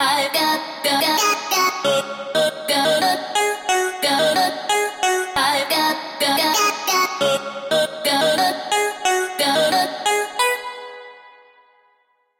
Taken from a track I produced.
FOLLOW FOR FUTURE TRACKS!
All samples taken from the song: I am with you By: DVIZION